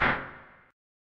Spasm 13 FRUITY TWEAKED
Exotic Electronic Percussion40